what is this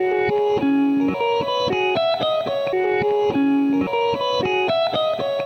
Clean Electric Guitar Loop
Looped some fragments of one of my guitar pieces... enjoy!
clean, electric-guitar, guitar, loop